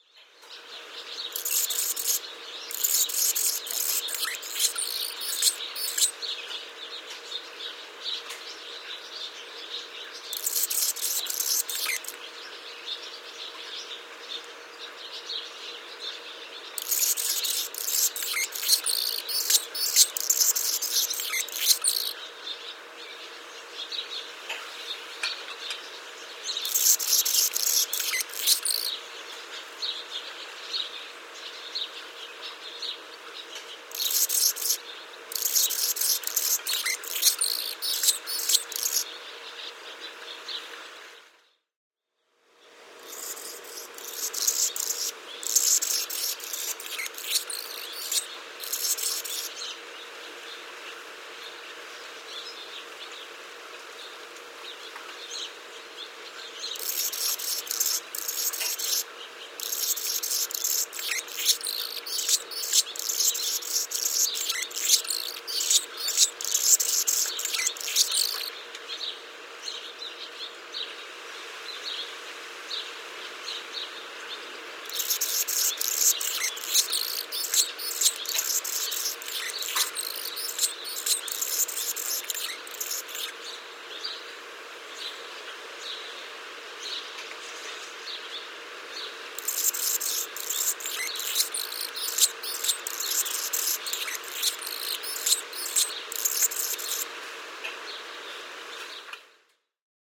ag05aug2012 bpd1k
Annas-Hummingbird, avian-acoustics